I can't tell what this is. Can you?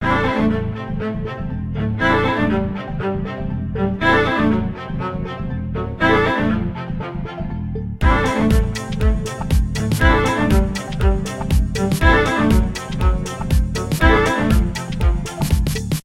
Dangerous seduction while people wear suits that cost a fortune Music
Little song loop made with Garage Band.
Use it everywhere, no credits or anything boring like that needed!
I would just love to know if you used it somewhere in the comments!
classy, loops, music, strings